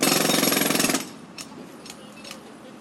Machine loop 12
Various loops from a range of office, factory and industrial machinery. Useful background SFX loops
machinery, industrial, sfx, loop, factory, office, print, machine, plant